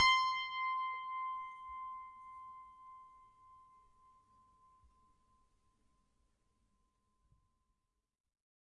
a multisample pack of piano strings played with a finger
fingered, multi, piano, strings